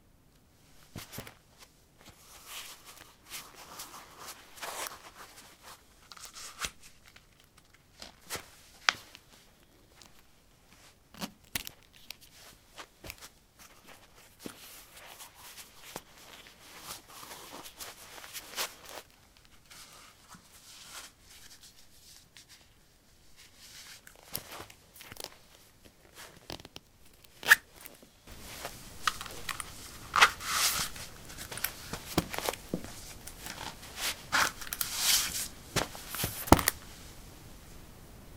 carpet 07d leathersandals onoff
Getting leather sandals on/off. Recorded with a ZOOM H2 in a basement of a house, normalized with Audacity.
footstep, footsteps